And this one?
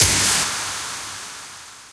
High hit put through rverb
electronic, fx